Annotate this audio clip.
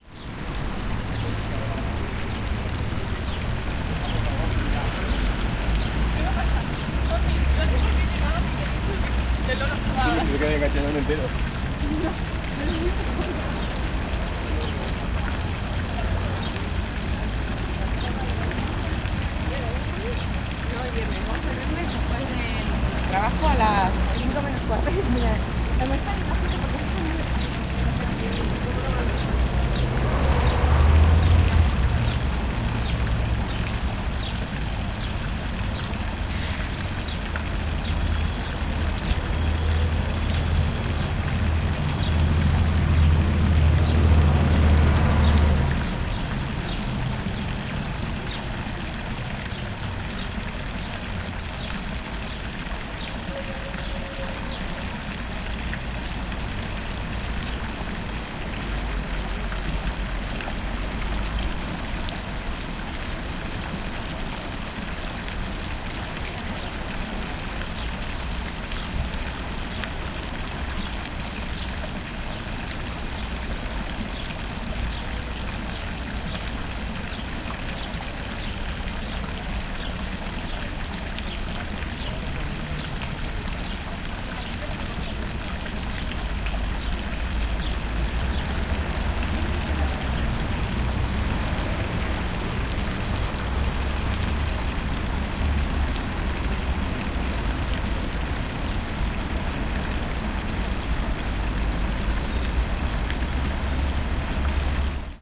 0213 Fountain Canovas
Fountain in a park. Birds and people talking Spanish. Traffic in the background.
20120324
spanish, spain, field-recording, voice, fountain, caceres